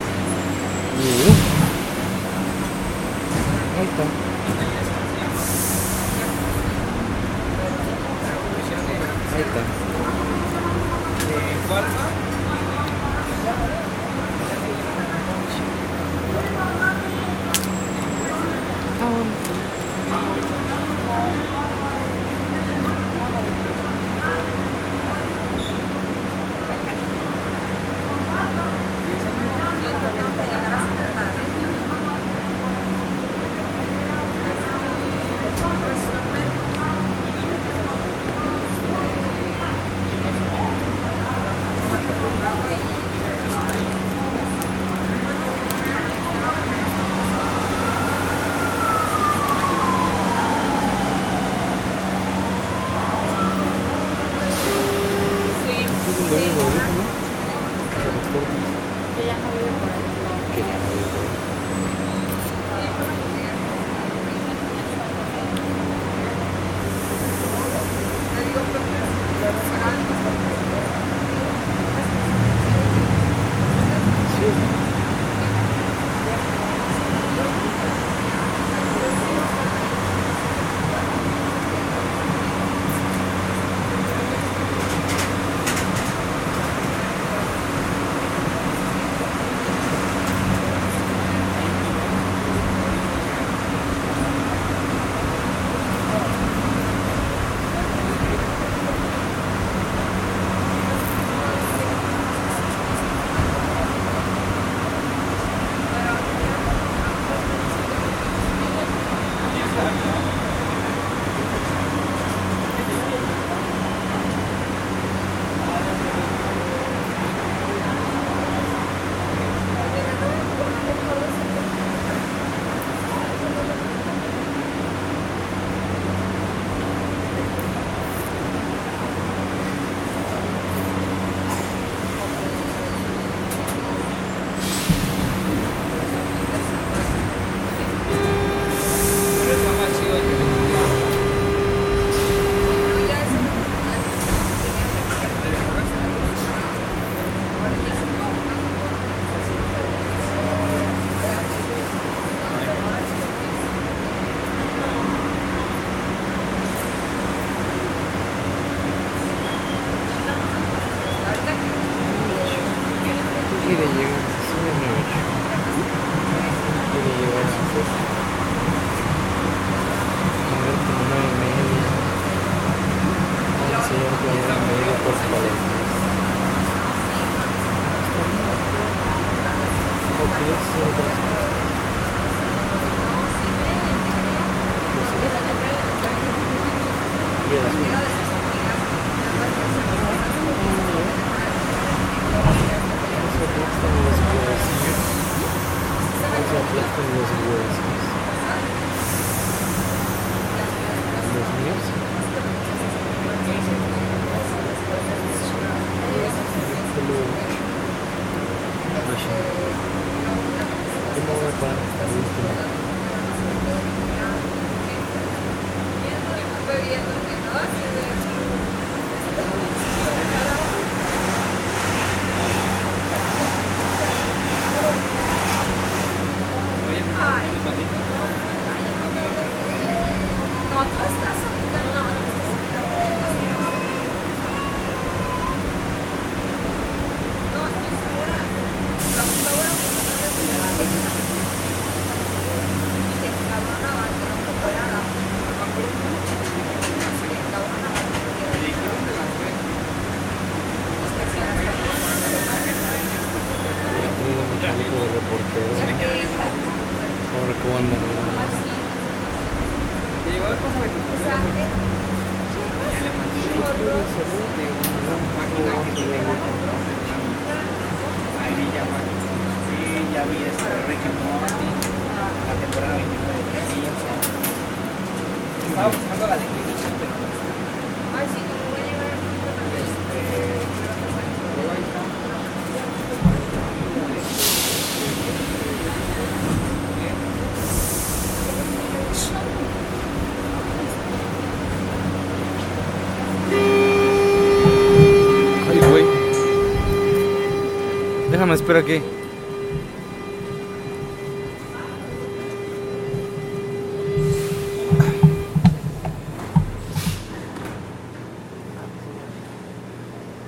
Un viaje por el metro de la CDMX. A trip for the MExico City´s Metro.